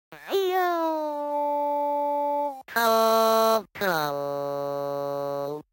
FreakenFurby Glitch 08
Samples from a FreakenFurby, a circuit-bent Furby toy by Dave Barnes. They were downsampled to 16-bit, broken into individual cues, edited and processed and filtered to remove offset correction issues and other unpleasant artifacts.